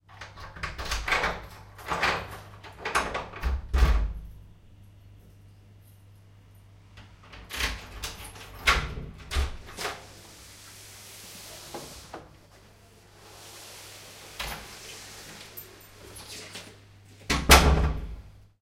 door 03 entering
Door opening and closing with keys. Recorded with Zoom H2 in stereo.
leaving, open, close, door, entering, house, locked, keys